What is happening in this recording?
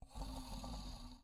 A growling sound
beast, creatures, growl, growls, monster